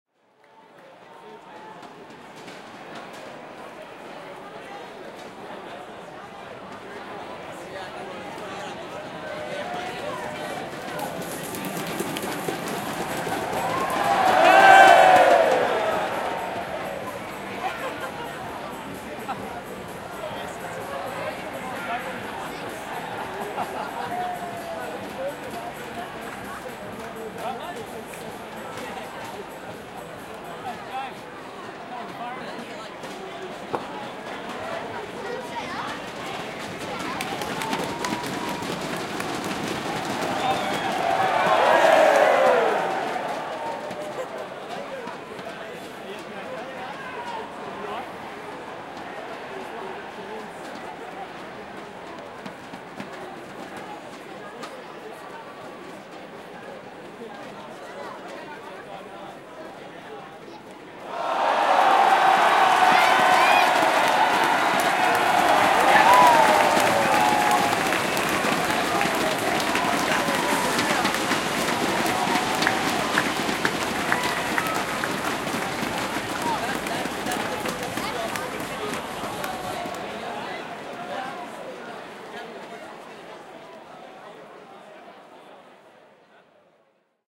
In the crowd while a mexican wave goes around at a cricket match.
cheering, cricket, wave, crowd, mexican